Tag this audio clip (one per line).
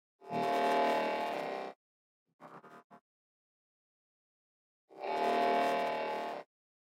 I
if
Let
Now
talk
tomorrow